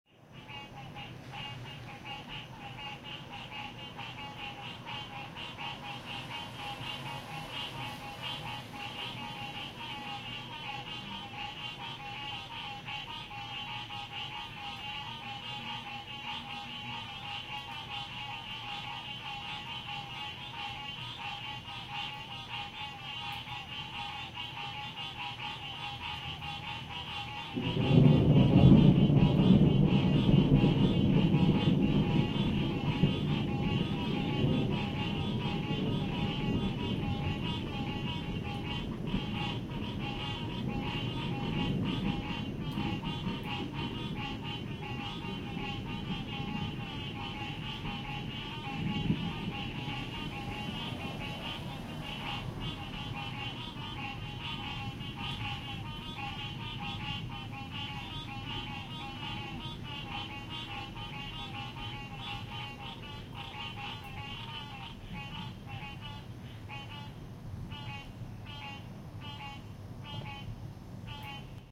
Frogs and thunder
These weird frogs were singing after a rainstorm in South Carolina. A rolling thunder sound came in too.
Field-recording, thunder, frogs